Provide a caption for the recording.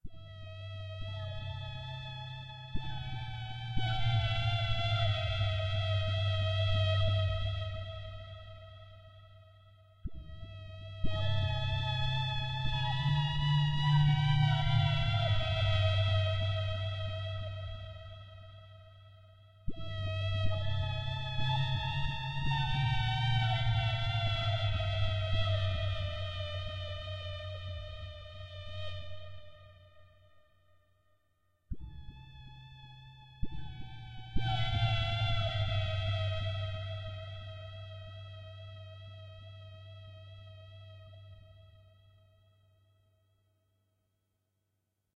This is a short spooky music loop perfect for a horror atmosphere.